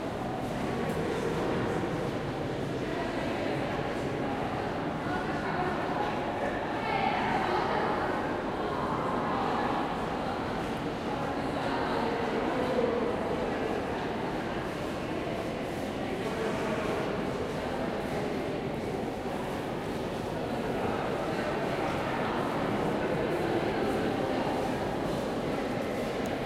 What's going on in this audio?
People in a large hall with a lot of reverberation.